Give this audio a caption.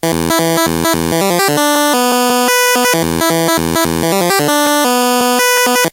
Orion Pattern 2
big, c64, chiptunes, drums, glitch, kitchen, little, lsdj, me, melody, my, nanoloop, sounds, table, today